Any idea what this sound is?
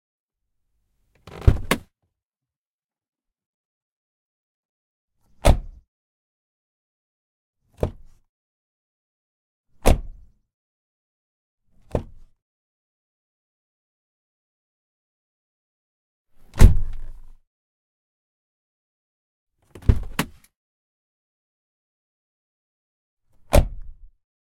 Auto Door Open Close
Car door being opened and closed
Auto, Door